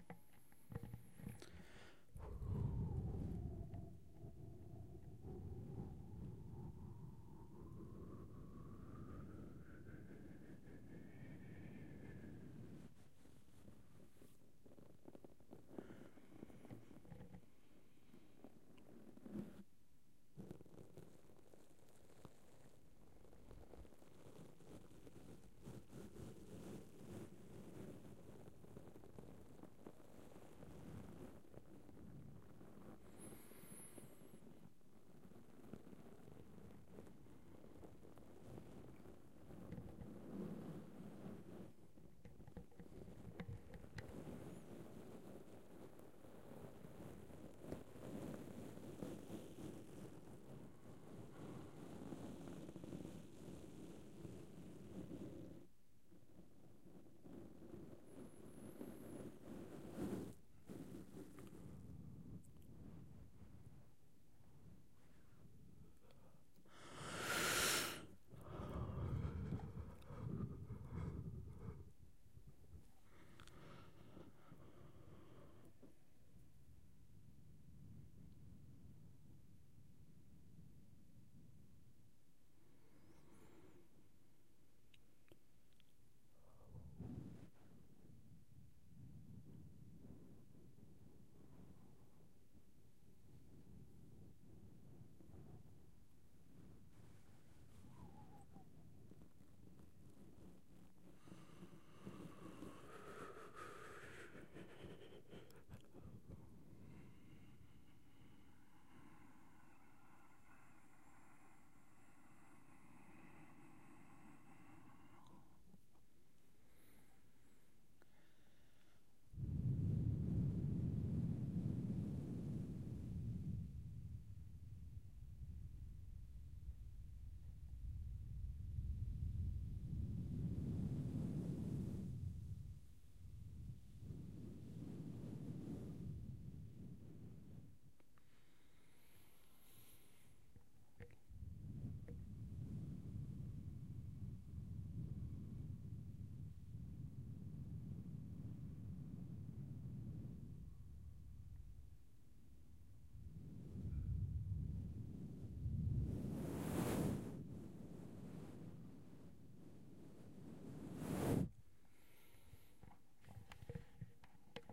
I was trying to record some "wind" noises with my mouth by blowing at the mics, when I came to close and my beard started to crackle against them. I liked the soudn and I actually used it later on some stuff - it's not a great quality recordning but it might interest someone. Sorry for the "windy" sounds at the start...
Recorded with a Zoom H4 N